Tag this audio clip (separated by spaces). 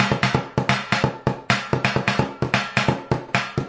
acoustic drum fast hoover beats beat improvised food industrial funky breakbeat loop 130-bpm hard dance percs groovy drum-loop garbage bottle loops perc container metal ambient break drums music percussion cleaner